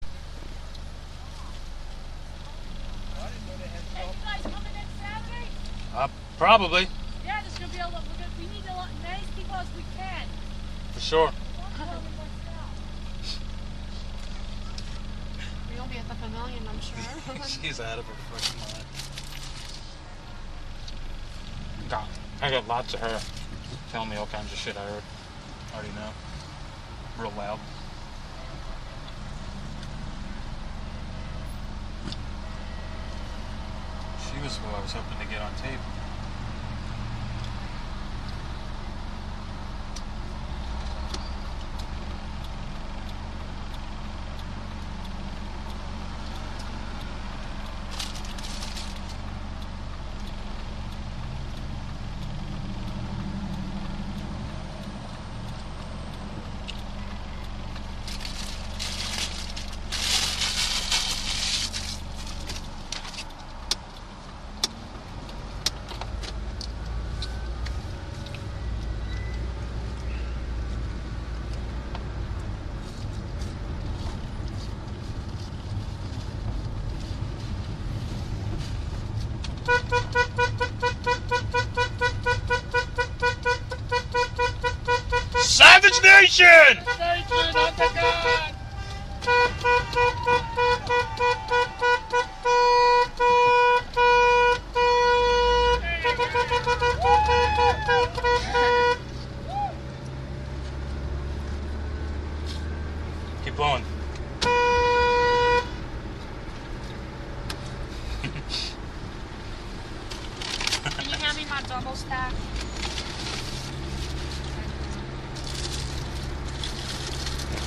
After all that hatred and minority killing, it was time for an evil american cheeseburger and a drive by the last few protesters recorded on Olympus DS-40 without editing or processing. See if you can catch the punchline...
field-recording, protest